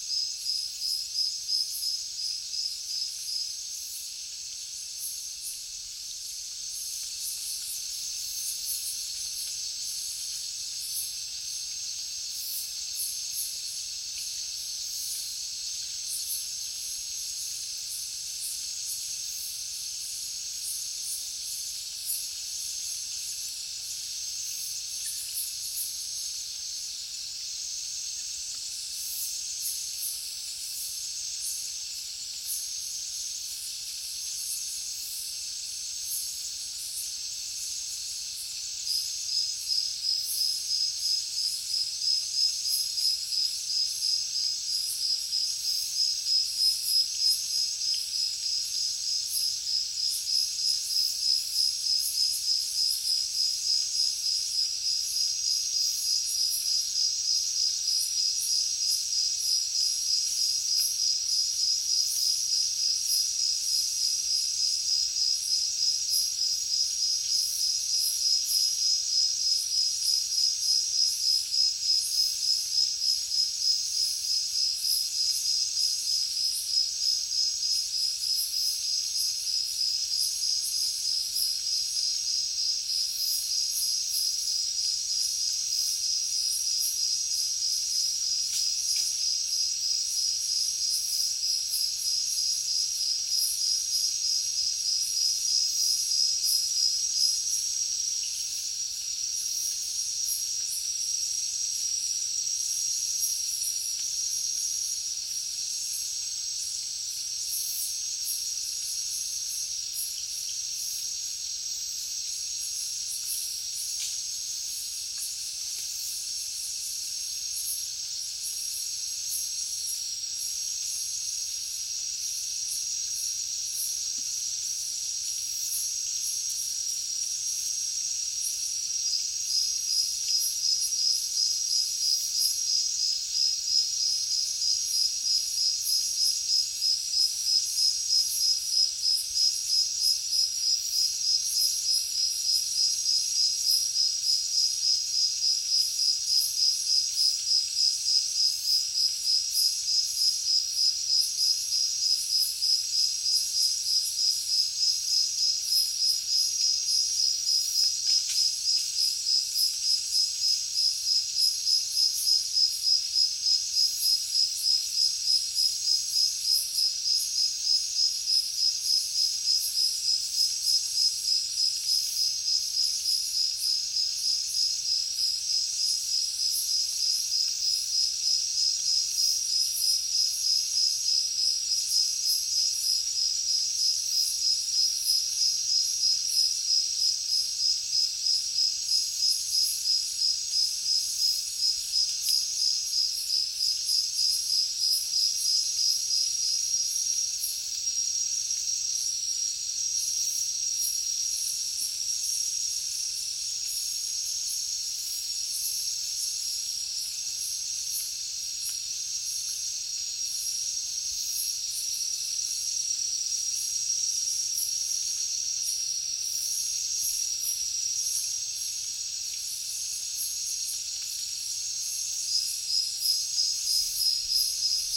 Thailand jungle night heavy crickets